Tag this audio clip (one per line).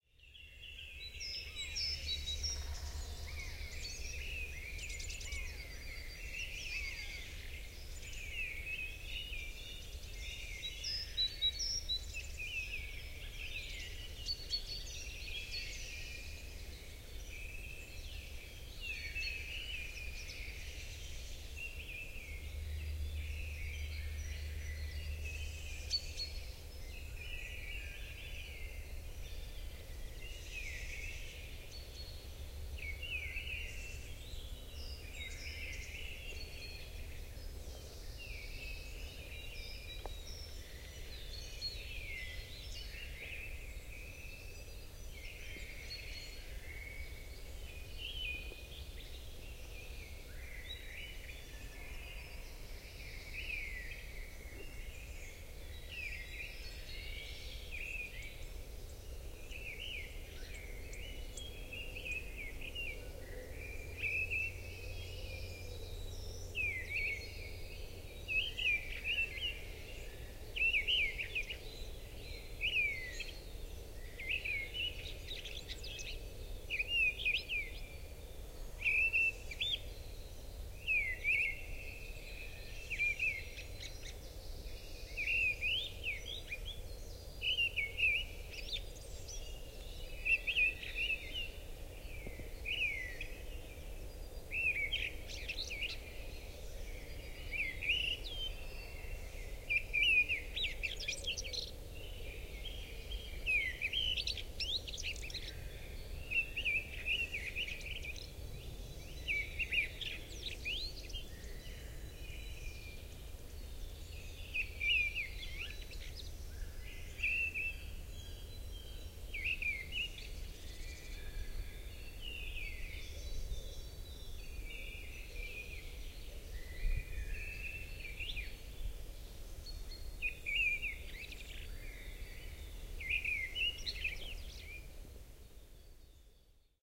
thrush
afternoon
birdsong
forest
mistle